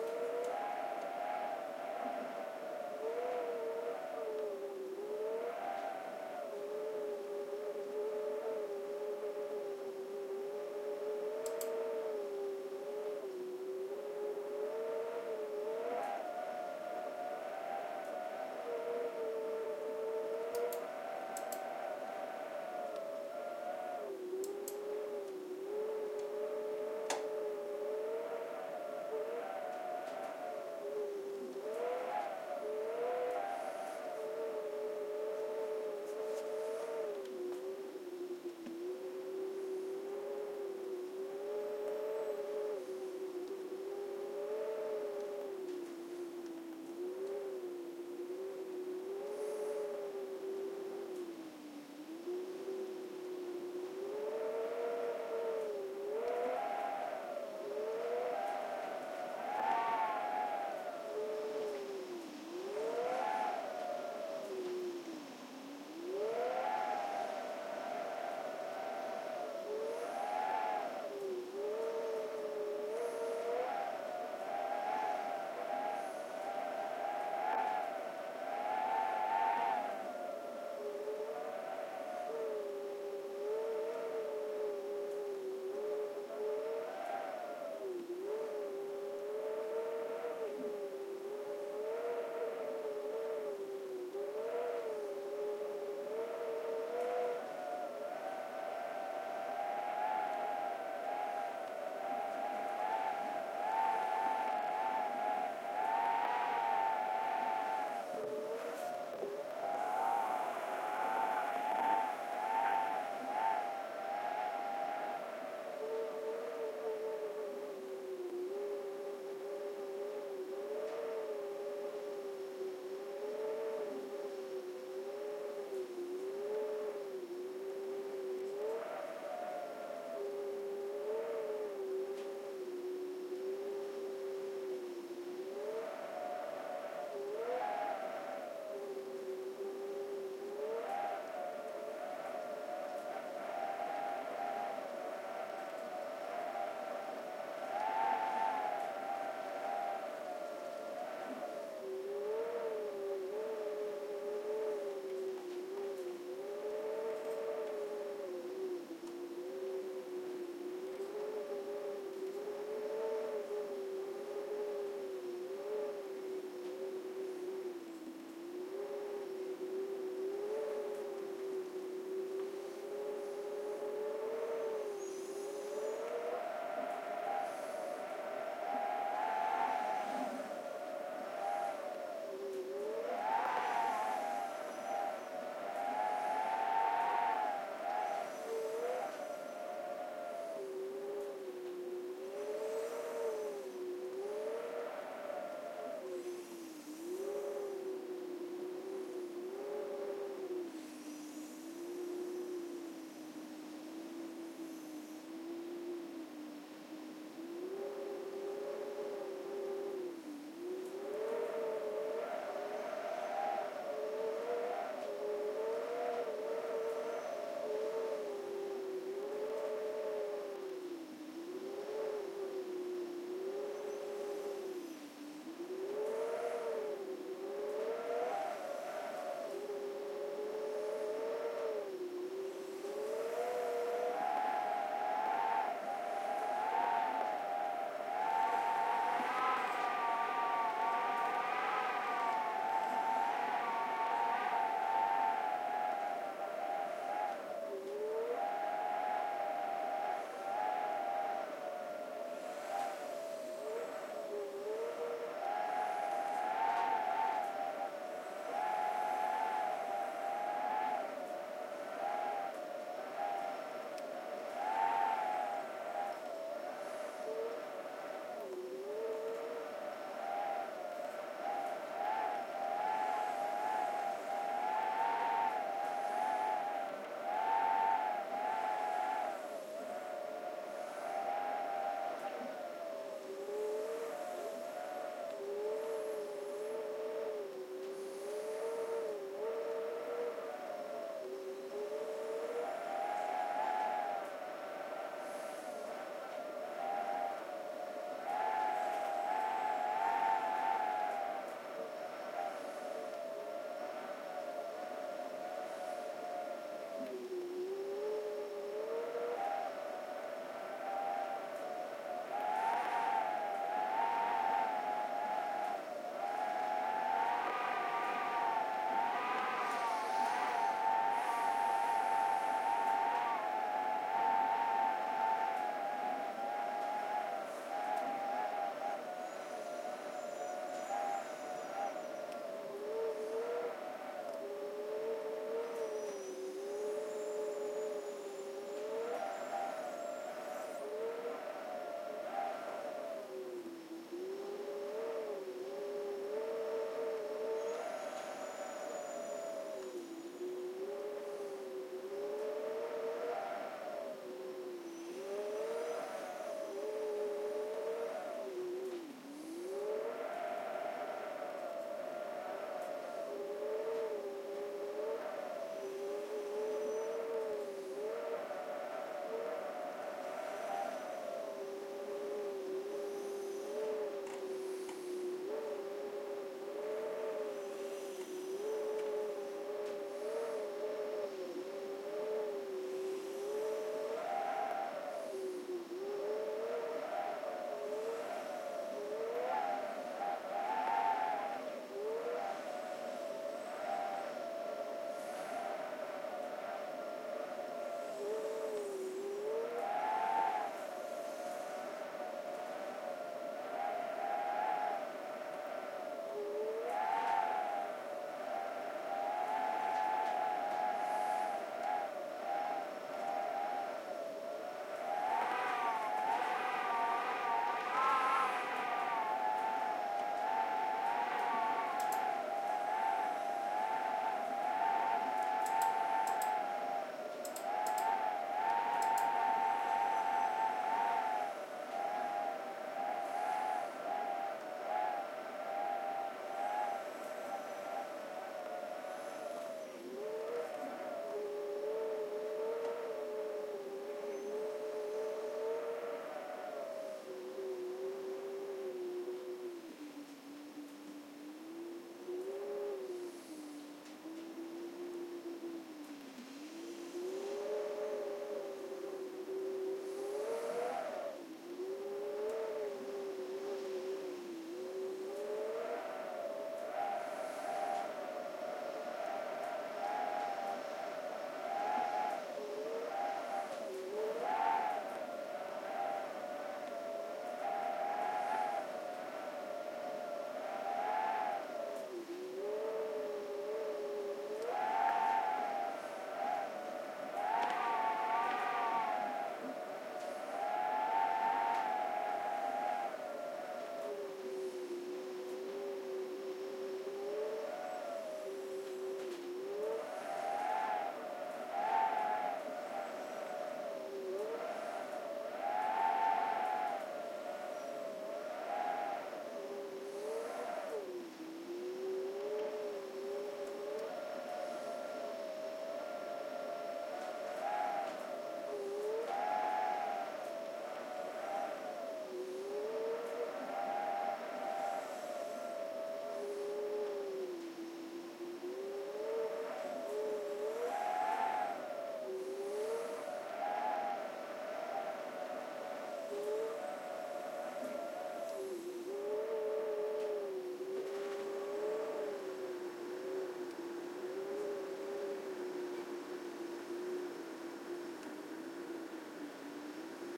Whistling Wind in Window 3 RX
Winter wind whistling through a crack in the window. Cleaned up a few thumps in iZotope RX.